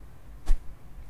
Lanzamiento Daga
cutting air with a ruler